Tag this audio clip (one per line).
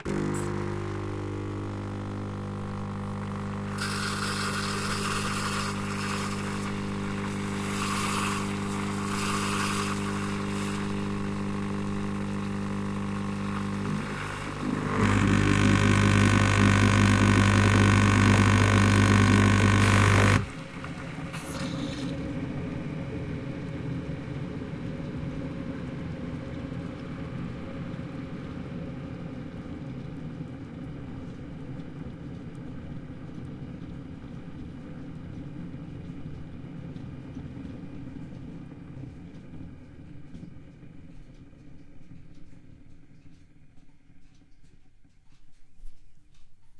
senseo
coffee
gargle
machine